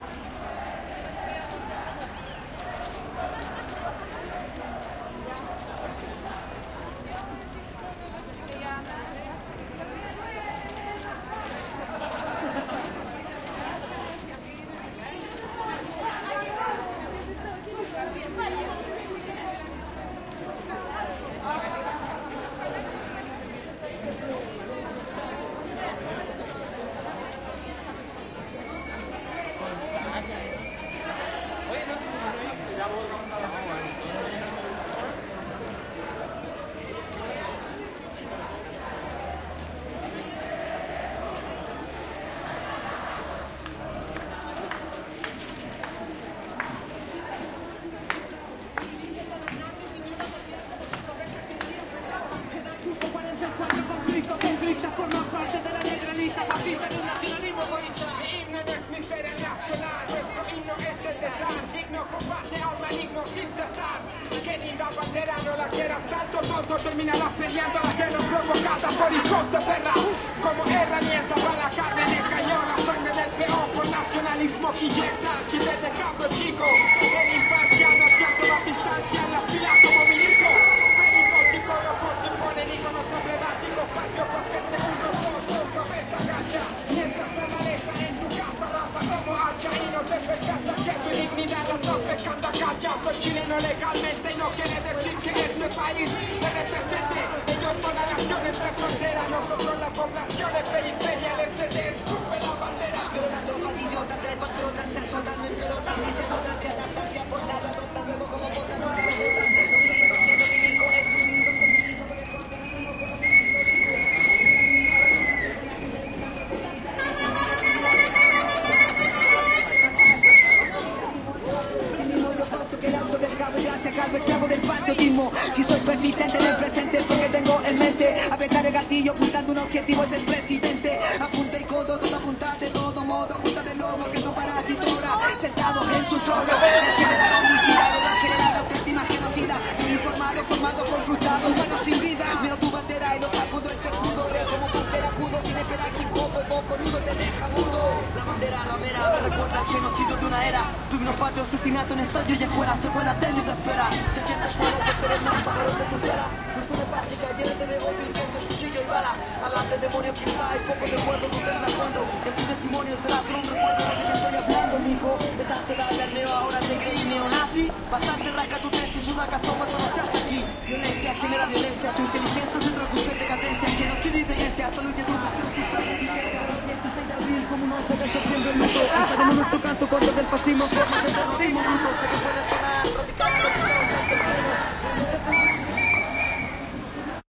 marcha de las putas y maracas 06 - ambiente general
Conversaciones de la gente se adornan con un rap subversivo.
marcha, silvestri, leonor, protest, street, gritos, protesta, crowd, rap, calle, santiago, chile, maracas, putas